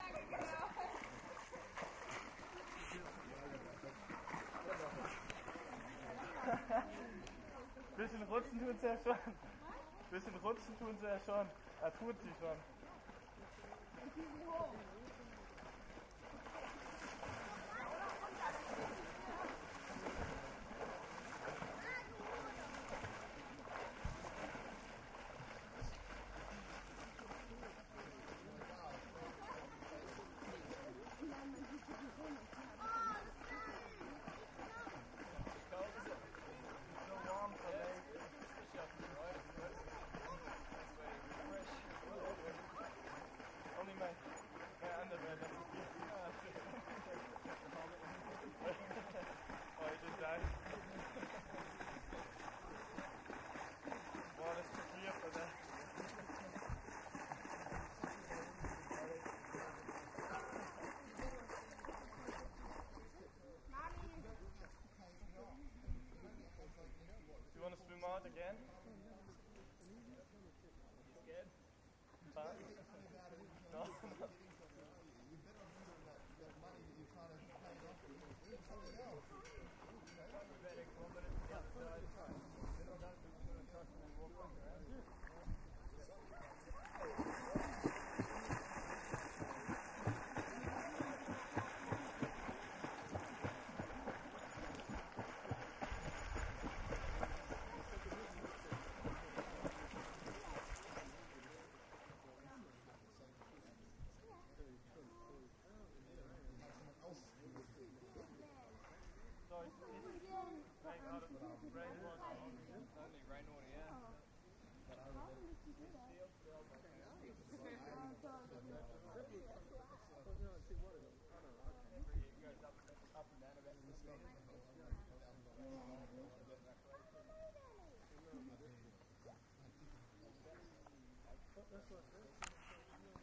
Lake Eacham Tourists Swiming
Tourists (some German) swim in Lake Eacham in Northern Queensland, Australia. The kicking splash sound was a boy on a body-board kicking along.
Scientists believe Lake Eacham was formed approximately 12,000 years ago when magma rose to the surface and heated the water table and caused crater-sized explosions. Eventually water filled the craters and the trees grew back, creating the tranquil lake used today by families and tourists for recreation. The lake is fed by underground springs so it retains a constant water level and is unaffected by drought.
Lake Eacham is 60 metres deep, and features a pontoon great for diving into the deep water. A large grassy area is terrific for picnics, sunbathing, or just watching the kids as they play in the shallow water near the edge of the lake.
Recording chain: Edirol R09HR internal mics.
splash, lake-eacham, yidyam, wiinggina, recreation, swimming, field-recording, tourists, german, fun, atherton-tablelands, kicking, water, australia, wet